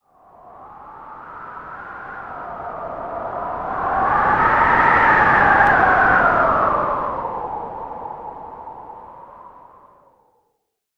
gust-mono
This is simply a mono version of Tony-B-kksm's "Gust of Wind, Moving Left to Right".
gust, storm, wind